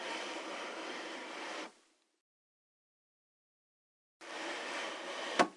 wooden Drawer open and close
a Drawer opening and closing, from my room
nothing
open, close, Drawers, closing, Drawer, opening